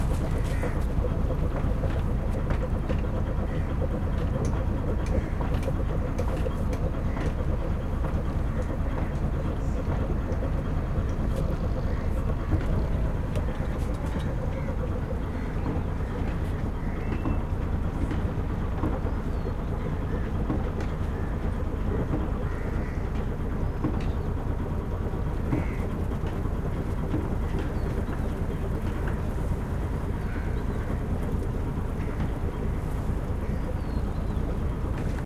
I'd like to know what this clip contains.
fishing boat idle rumbly engine and wood flopping on left India
fishing, boat, engine, rumbly